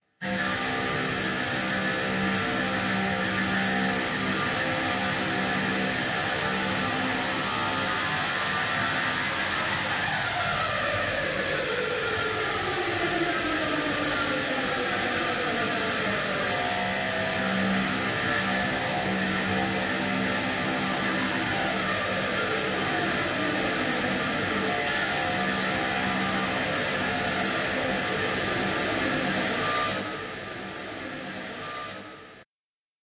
made with Line6 POD with a lot of reverb and other added filters and distortion
distortion, scratchy, spacious